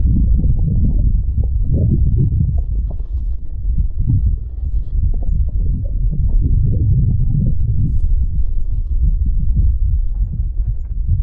underwater-bubble-submerge-deep-drown
Ocean-like sub frequency information. Can be used as a layer for many purposes.